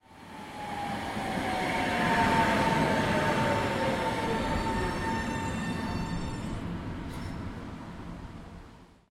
Arrival of an tram to the station